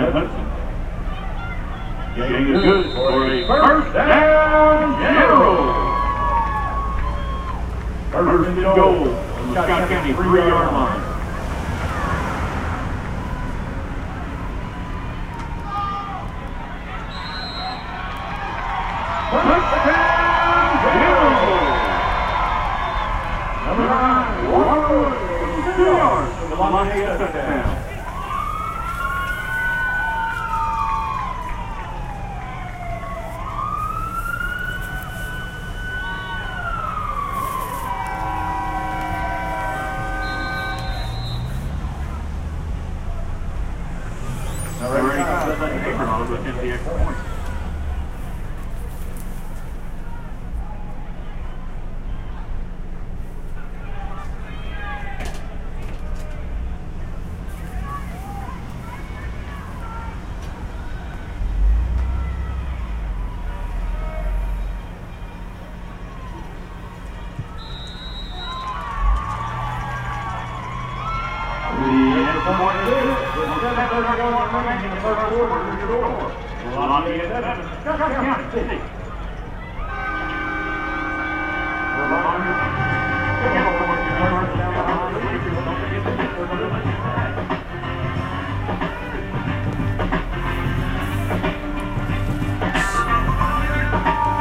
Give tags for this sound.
school
high
football
crowd

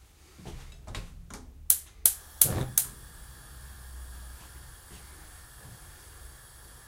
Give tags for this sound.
burn
field-recording
flame
kitchen
flames
hissing
gas
burning
hiss
ticks
gas-stove
stove
tick
fire

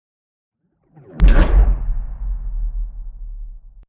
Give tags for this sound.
closing
atmospheres
bay
door
sci
pod

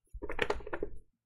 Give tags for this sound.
animation
cartoon
flap
quirky

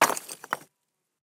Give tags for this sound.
ambience
atmosphere
avalanche
canyon
cinematic
cracking
crumbling
debris
effect
effects
fx
glitch
impact
movement
nature
rock
rocks
rupture
sci-fi
sfx
slide
sound-design
special-effects
stone
stones
texture
trailer

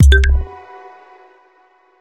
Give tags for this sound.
achievement
application
bleep
blip
bootup
button
clicks
effect
event
future
futuristic
game
intro
menu
notification
sci-fi
sfx
sound
startup
video

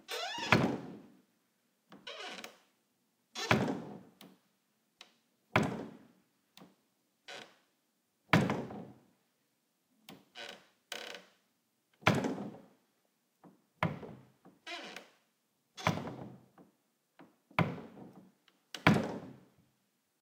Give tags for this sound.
open
wooden
light
door
hollow
close

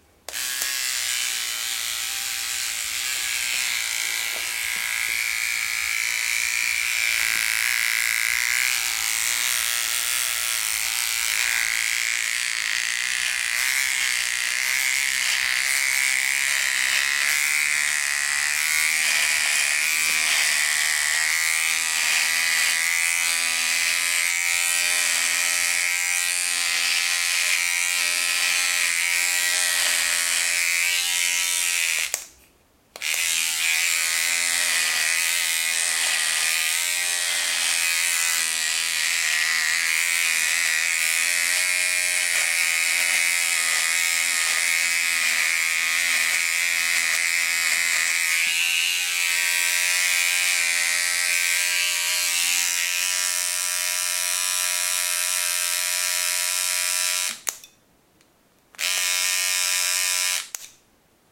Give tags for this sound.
Trimmer
Hum
shearing
Beard
Static
Electrical
Razer
sheep
Clean
Electric
Buzz
Shaver
battery
Vibrate

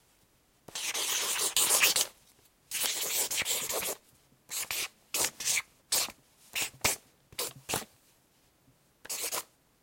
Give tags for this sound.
Czech
writing
Panska
Office
Highlighter
CZ

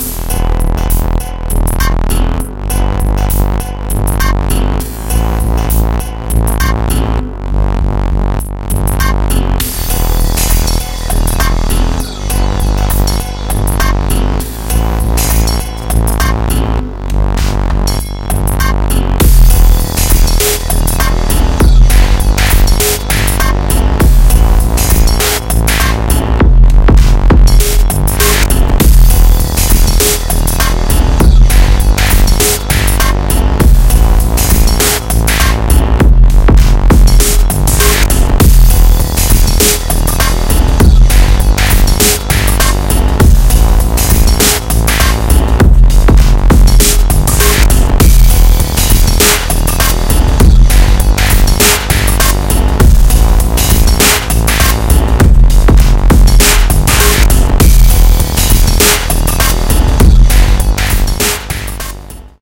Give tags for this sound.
bassloop
drum
techno

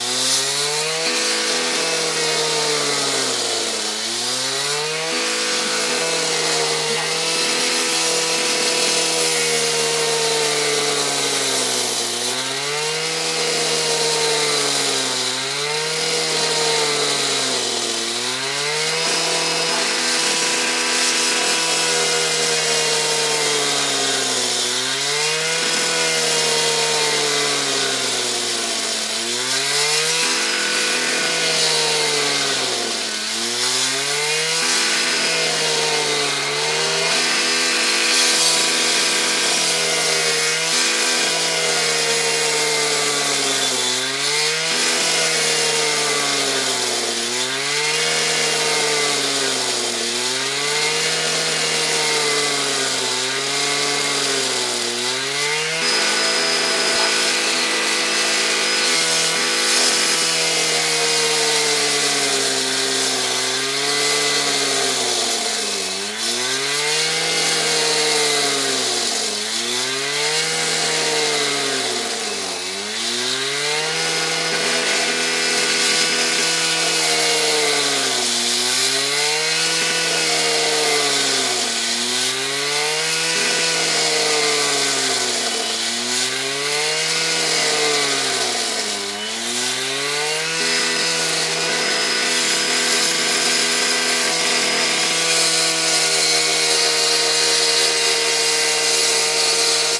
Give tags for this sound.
100-sec
field-recording
flickr
industrial
noise
streetsound
unprocessed

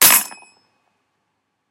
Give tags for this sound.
metal
ching
coin

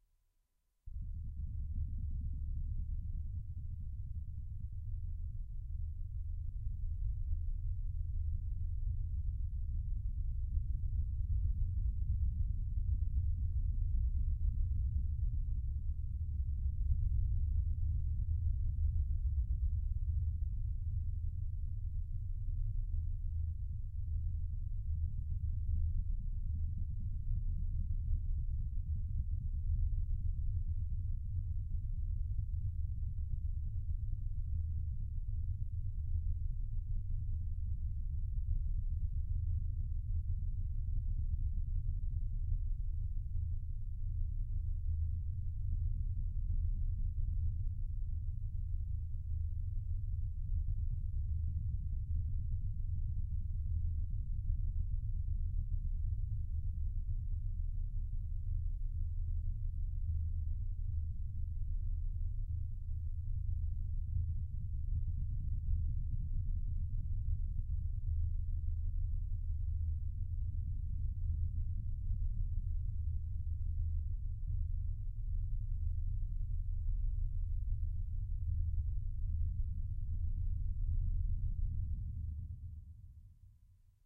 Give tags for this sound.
machine
science-fiction
synthesized
M-Audio-Venom
ambiance
futuristic

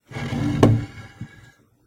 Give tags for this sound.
Dragged
Pull
Push
Pushed

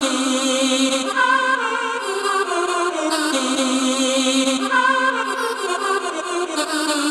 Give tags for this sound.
Choir
Echo
Synth

